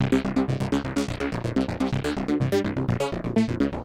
hjoohhj acid2 1 125bpm
Acid Loop for you